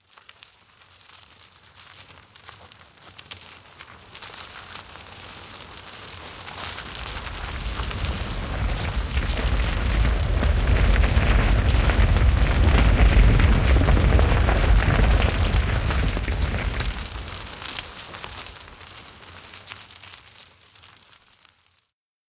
A stitched-together simulation of a landslide sound effect. Made by layering recordings of rocks tumbling at different speeds.
landslide, rocks, tumble